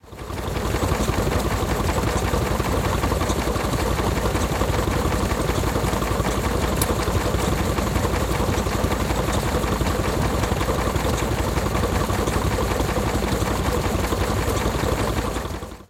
Stationary engine used to drive machinery typically on a farm. Also suitable as general open-air industrial sound.